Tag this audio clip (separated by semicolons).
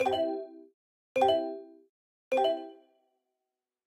prompt; game; notifier; cartoon; message